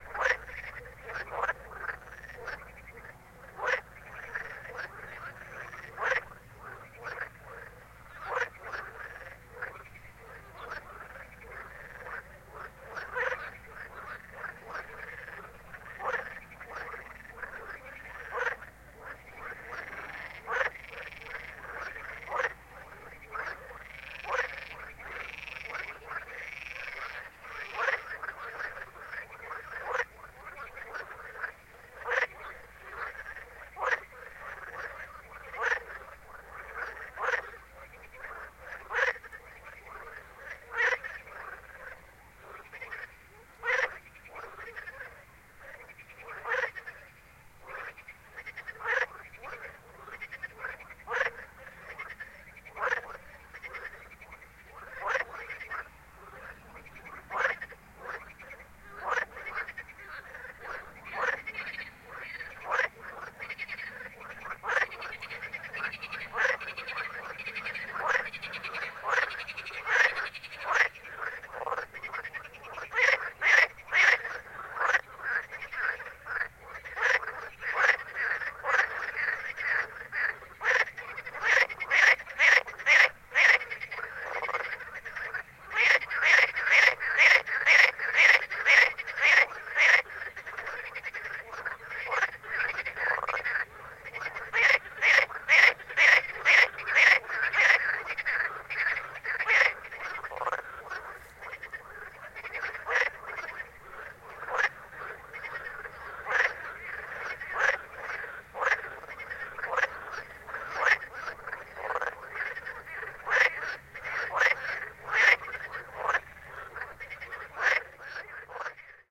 Grenouilles Ruaudin nuit 2013 3
Une colonie de grenouilles à une heure du matin, sur une mare à côté du Mans.
On entend quelques bruits de circulation dans le fond, mais les grenouilles étaient très proches.
Frogs recorded near Le Mans, France. Though we can still hear things in the background, the frogs were very close to the microphone.
swamp, France, frogs, field-recording, nature